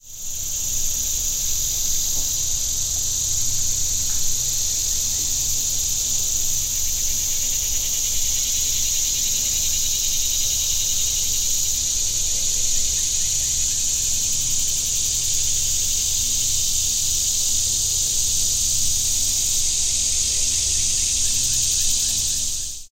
Ambience Farm Summer 01
Summer farm ambience
field-recording, summer